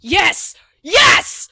triumphant yes x2
WARNING: LOUD
beating a particularly hard night on FNaF4.
english, yes